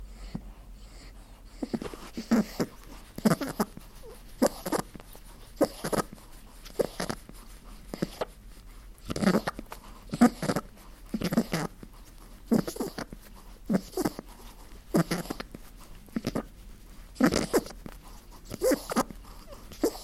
bunny left ear
Bunny has his left ear stroked and makes a purring sound.
snuffles, wheeze, gurgle, purr, rabbit